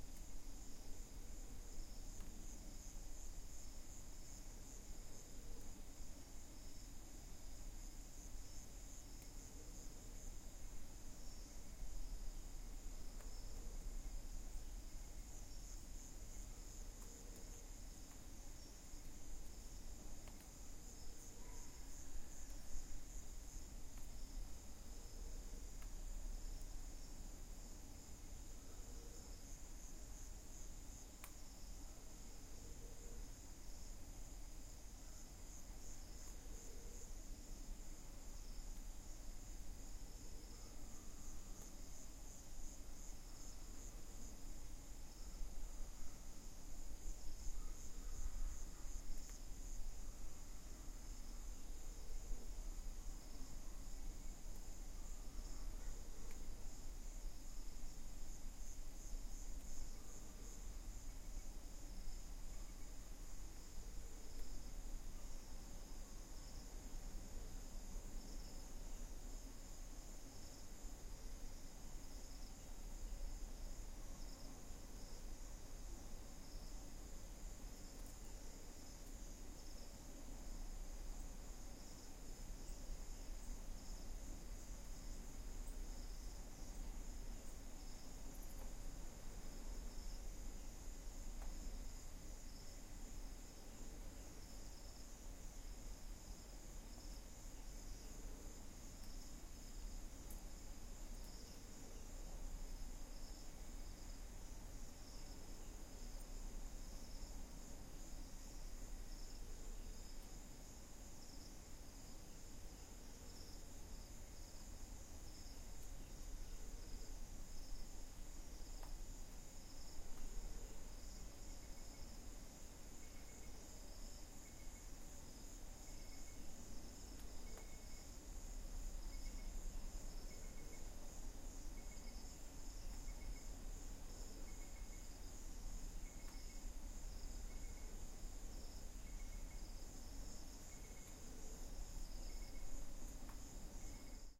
AMB Ghana Night Crickets LB
Night Insects in rural part of Ghana
Africa, Ghana